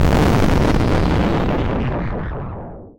An explosion handcrafted throught SoundForge's FM synth module. 3/7